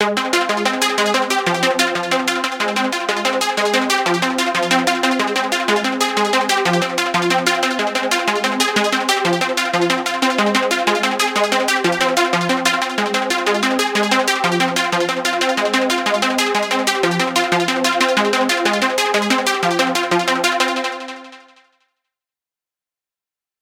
Trance Pluck 2 (185 BPM)
Another one made in Serum
DJ, 6x6, Records